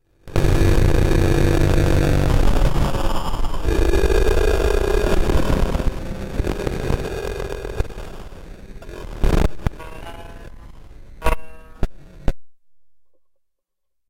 Circuit-Bent Wiggles Guitar
Noises recorded while circuit-bending a toy electronic "guitar"
bent, glitch, broken-toy, circuit-bending, wiggles, circuit-bent